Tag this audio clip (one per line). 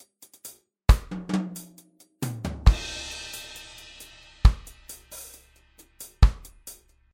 drum
loops
reggae